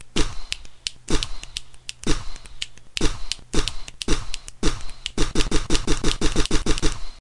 Finger Snap and Mouth. Mouth is full of air, slightly taped with hand to let the air out. Sounds like something else. Hear the other two added sounds.
finger, mouth